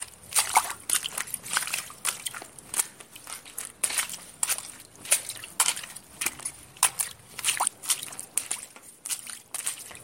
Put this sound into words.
Walking on a wet surface
Walking on a watery surface or mud.
legs, liquid, mud, shoes, walk, walking, Water, wet